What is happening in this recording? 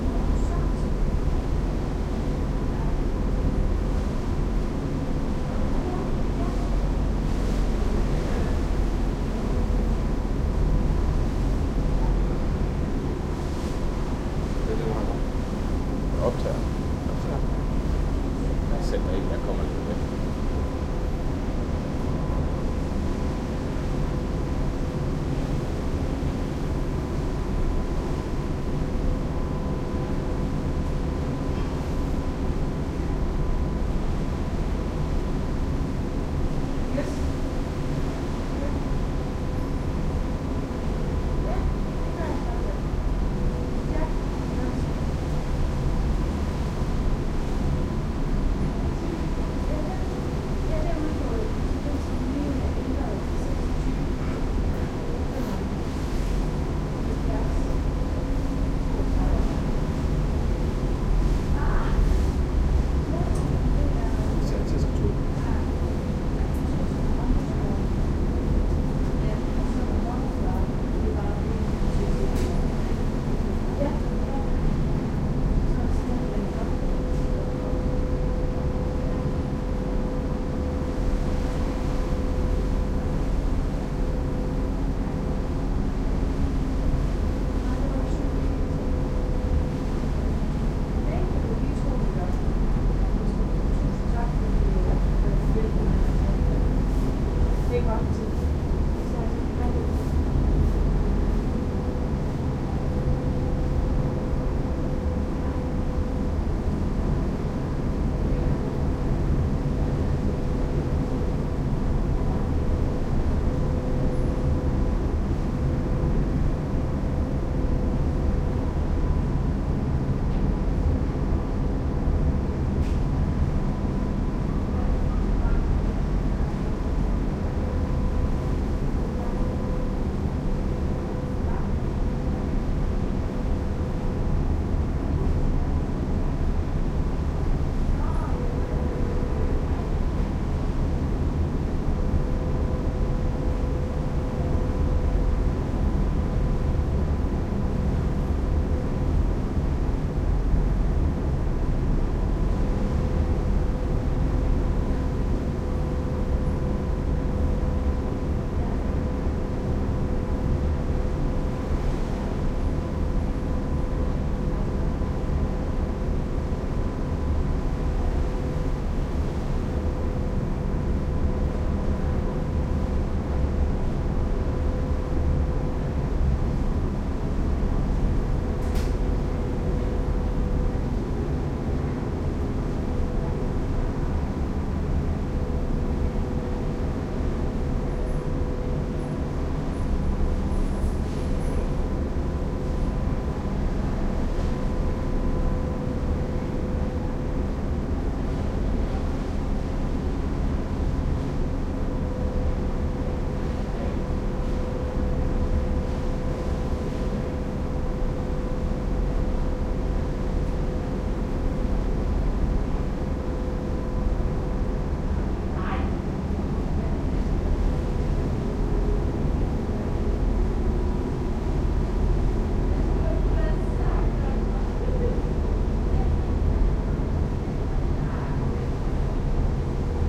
On the ferry 2011-12-03
This was a day with quite a storm coming from the west. I traveled to Fanoe from Esbjerg, Denmark, by ferry. The waves was quite heavy and the engine made quite some noise too. Recorded with a Zoom H2.